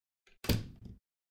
Door shut
Closing a door. No sound of hinges or locks, just the moment it shuts.